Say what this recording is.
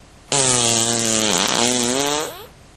looooooong fart
fart poot gas flatulence flatulation explosion